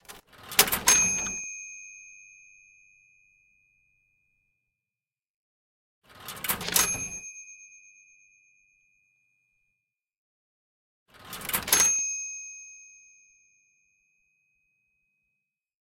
Buying Sounds
Three variations of a buying sound effect. Sound made for a cancelled student game.
Cash, Buying